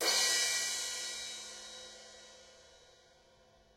CrashVAR2MS4
1-shot, crash, crash-cymbal, DD2012, drums, stereo